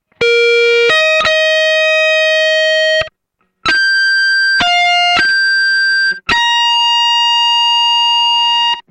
guitar.overdrive.harmonics.01
played with Ibanez electric guitar, processed through Korg AX30G multieffect (distorsion)
musical-instruments, overdrive, electric-guitar, harmonics